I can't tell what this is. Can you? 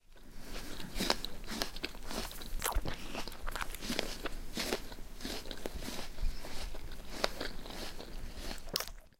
Apple Ruminating
Contemplative rumination of an apple. The closed mouth chewing is accompanied by a slight bit of nostril breathing. Recorded in a hifi sound studio at Stanford U with a Sony PCM D-50 very close to the source, a yellow/green golden delicious.